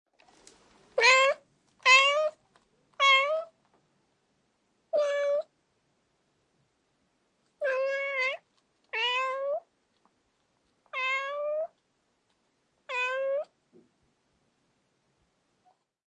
cat meowing D100 AB
closeup, domestic, feline